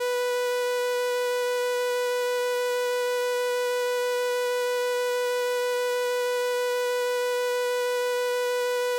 Transistor Organ Violin - B4
Sample of an old combo organ set to its "Violin" setting.
Recorded with a DI-Box and a RME Babyface using Cubase.
Have fun!